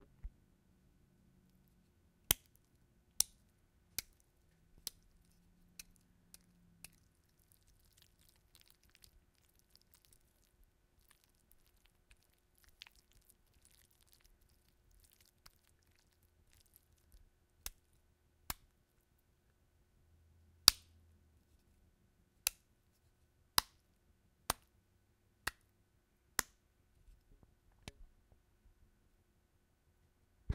wet slapping
Made some chicken alfredo and as I was slapping the noodles with the wooden spoon it sounded great! Works for some gross effects or if someone gets slapped you can supplement your slapping noise with some moisture for some comedic value ;)
I believe sound clips should be openly shared, but I am always interested in seeing how people use them ;)